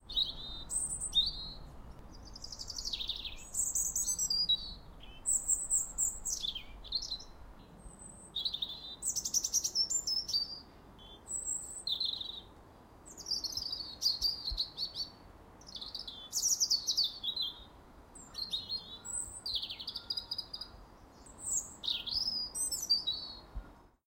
Bird Whistling, A
Raw audio of what I think was a robin communicating with another (don't quote me on that). It was about 2 meters away from the recorder.
An example of how you might credit is by putting this in the description/credits:
The sound was recorded using a "H1 Zoom recorder" on 1st March 2016.